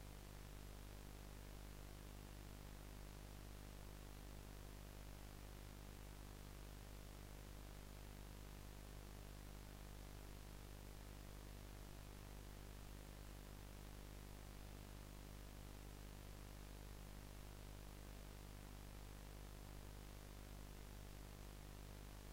VHS VCR hum B
VHS Hum made in audacity when playing around with frequencies
vcr, vhs, 80s